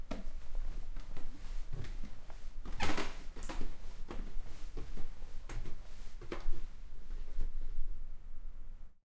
Walking up stairs, from basement to ground floor
A recording of me climbing some stairs.
feet, field-recording, foot, footsteps, stair, staircase, stairs, steps, walk, Walking